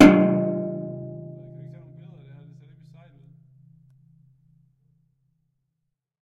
One of a pack of sounds, recorded in an abandoned industrial complex.
Recorded with a Zoom H2.
city, clean, field-recording, high-quality, industrial, metal, metallic, percussion, percussive, urban